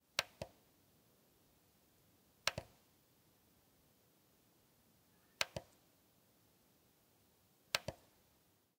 A mechanical push button of my preamp that i like, made of plastic. More in the pack.
Recorded in mono with h5+sgh6.
press, real, short, switch